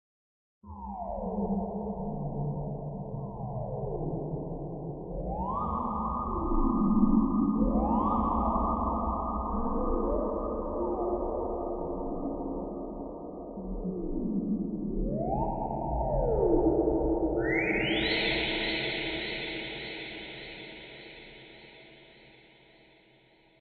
Heavily processed VST synth sound using various filters, reverbs and phasers.
Whistle, Phaser, Delay, VST, Space, Reverb, Filter